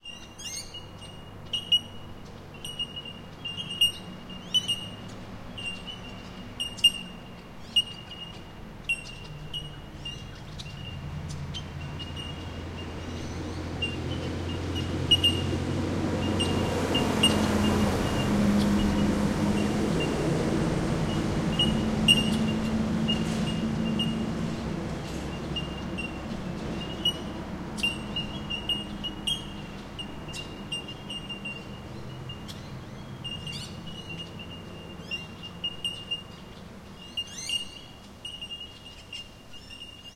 20110714 Mt Lindesay 02
A recording of passing traffic, local wildlife (mostly bell birds) and distant construction work.
Equipment: Zoom H2 using built in Mics.
Recorded at Mt Lindesay, Australia 14 July, 2011.